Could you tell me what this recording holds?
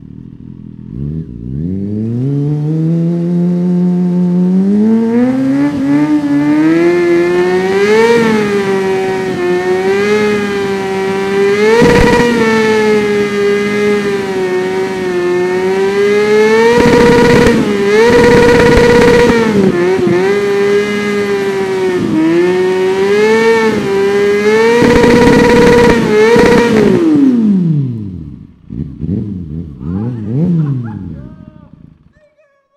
Kawasaki Ninja Burnout
I've made a burnout with my Kawasaki Ninja ZX6R and Recorded that.
Limiter, Motorcycle, Burnout, Exhaust, Kawasaki, ZX6R, Ninja, Engine